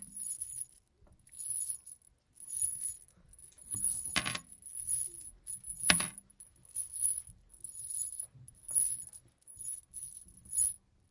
Mysounds LG-FR Kylian-metal chain
CityRings, Rennes